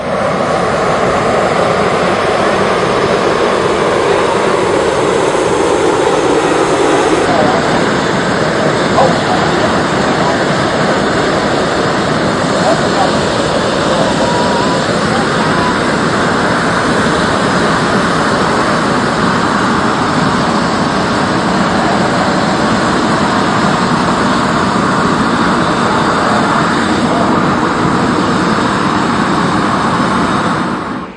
The sound of a hot-air balloon being initially primed by its gas heater.
Hot-Air-Balloon, Gas-Heater, Whooshing